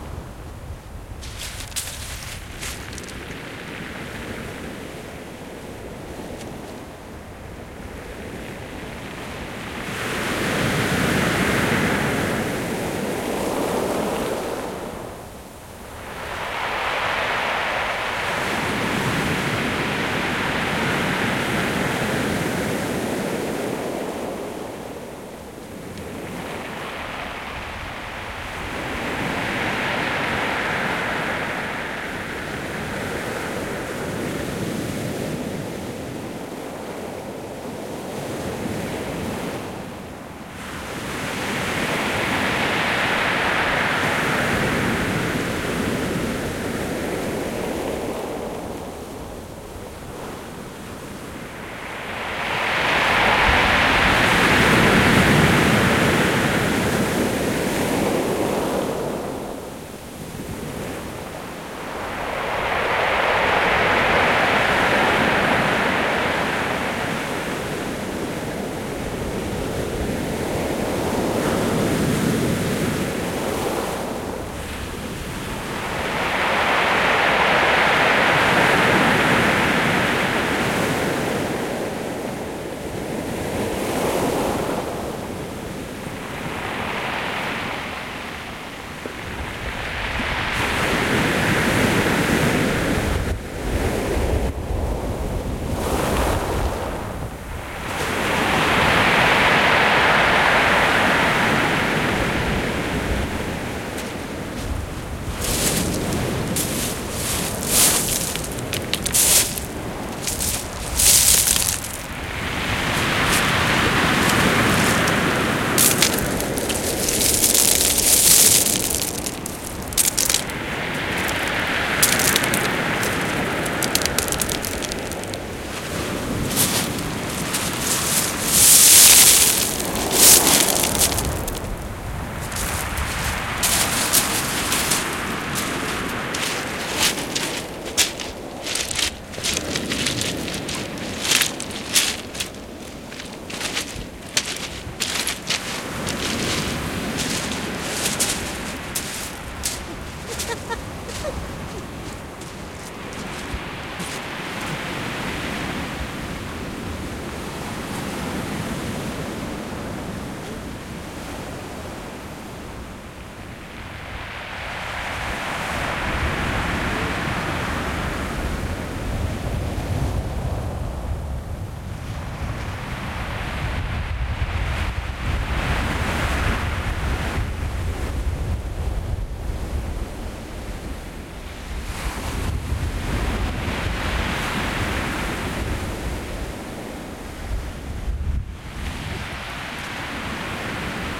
waves on shingle beach
waves making shingles roll, recorded with H4n